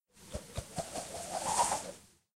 swinging rope
flinging a rope around